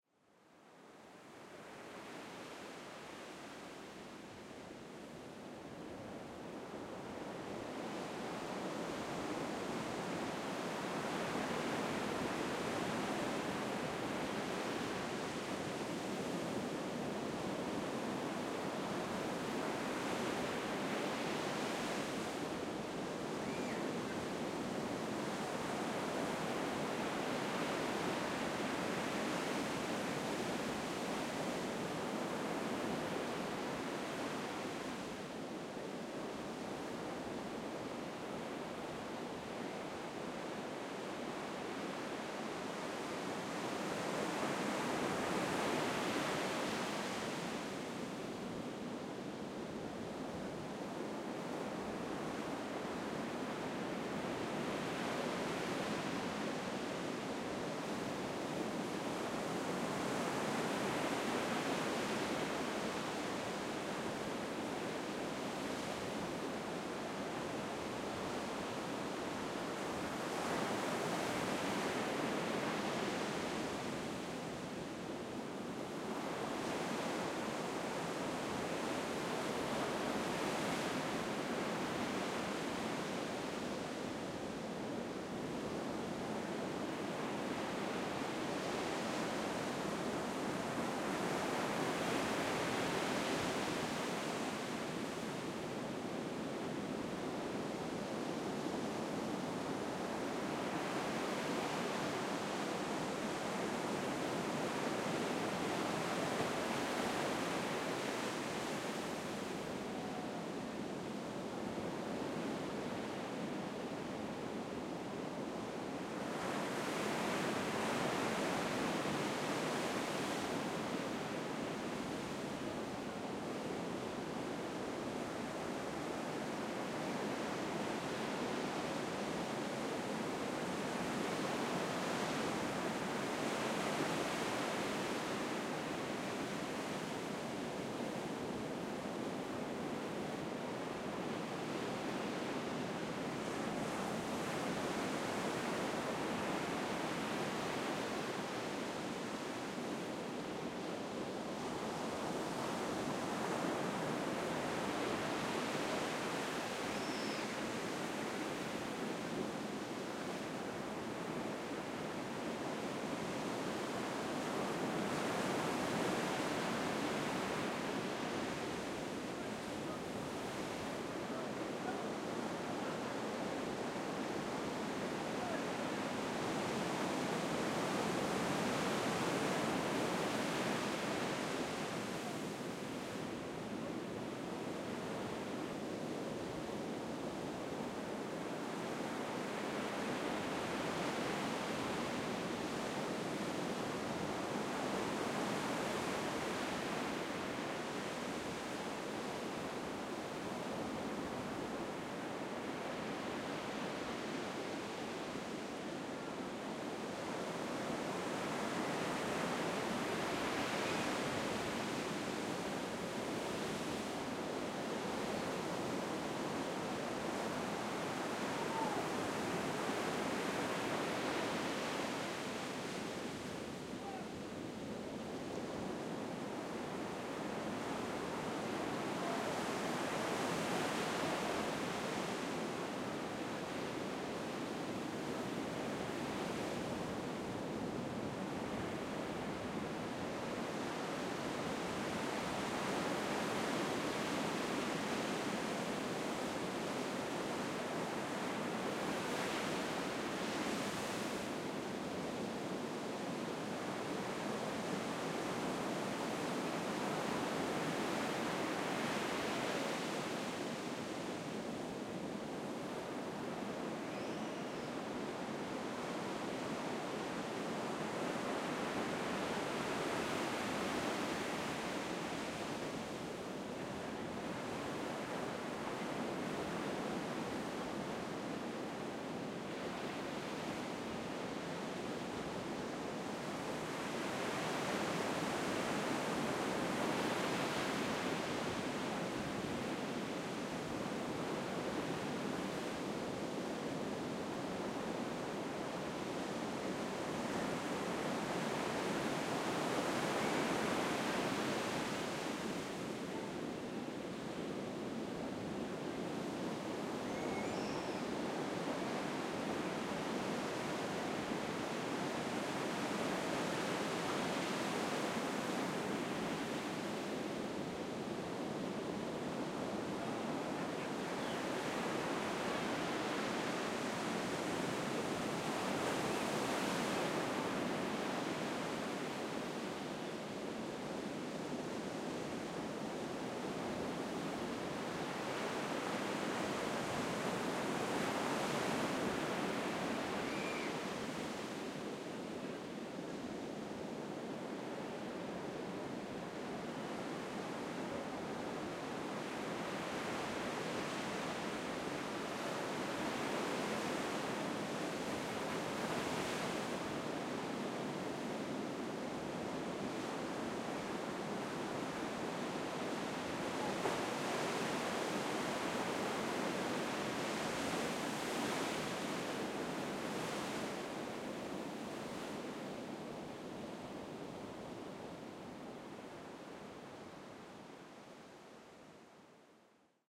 Manhattan Beach - Under the Pier
Stereo recording of waves coming in under the Manhattan Beach Pier